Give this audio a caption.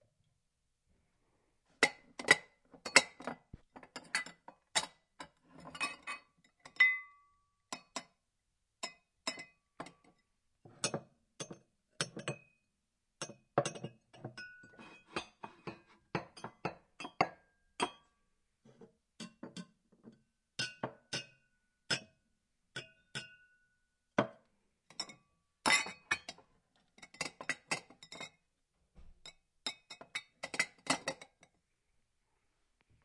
Glasses clinging together.